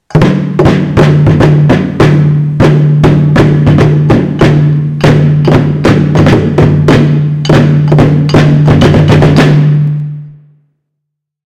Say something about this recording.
Big Drum Sound
band, drum, drummer, drums, marching-band, parade